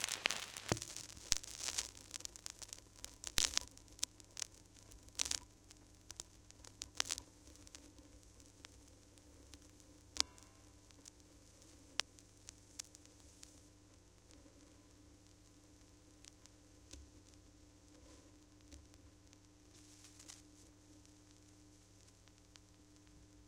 Vinyl Hiss, Cracks, and Pops 3
Authentic vinyl noise taken from silence between tracks off an old LP.